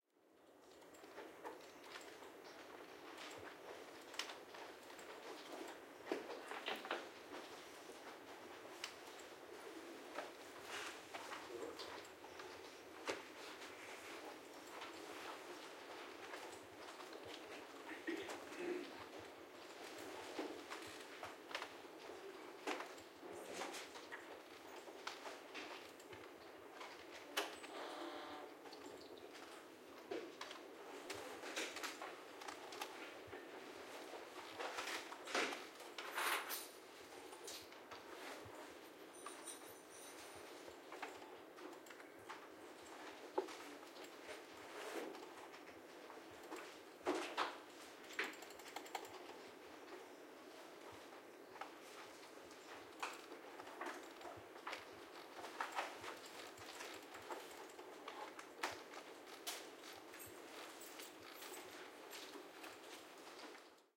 UP Library Study Centre Ambience
Surround ambience recorded in the post-grad study centre of the Merensky Library at the University of Pretoria. This is a space exclusive to post-grad students and is much quieter than the rest of the library. It has various meeting rooms and computer stations and you can hear people typing all round.
Recorded with 2 x Sennheiser MKH40 mics (rear and front Mid) and a Sennheiser MKH30 mic for the shared Side recorded into two Zoom H4n recorders. (Not such a practical setup, since upgraded to a Tascam DR-680). Recording decoded into regular 5.1 (L R C LFE Ls Rs), but you can use either of the front or back stereo pairs for stereo ambiences.
background, library, MMS, quiet, soundscape, surround, surround-sound, typing